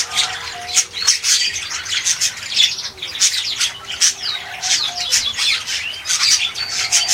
Taken on Nikon P500 while filming birds in the aviary in Burnby Hall Gardens, Yorkshire